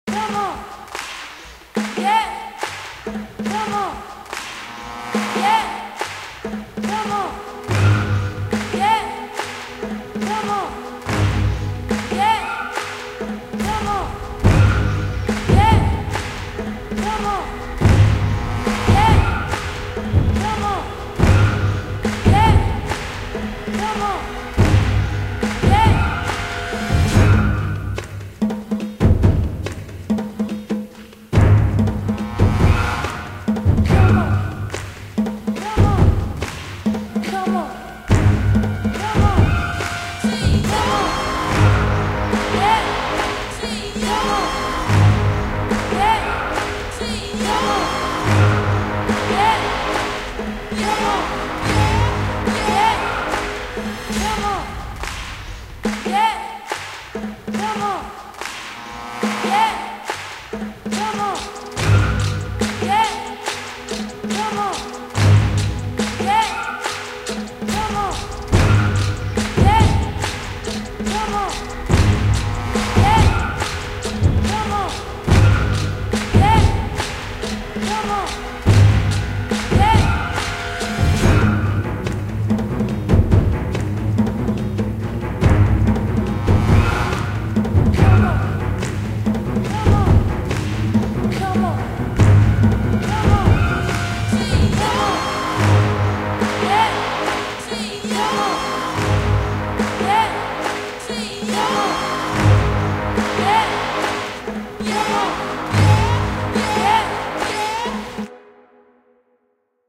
NOPE (MOVIE TRAILER SCORE)
Nope movie trailer score composed by me "Maurice YoungBlaze Clopton"
movie, cinema, trailer